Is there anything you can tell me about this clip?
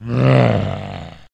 Recorded and edited for a zombie flash game.